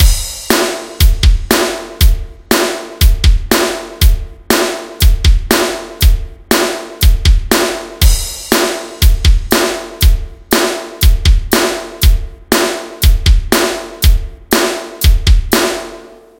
Drums rock basic 120bpm
I took some isolated sounds (Hi.hat, kick and snare) and joined them into a drum rythm very basic